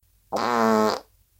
small-realpoot111
An abrupt, forceful poot (fart) that sounds a bit like a trumpet blow. Processed with Audacity for more consistent tone and volume.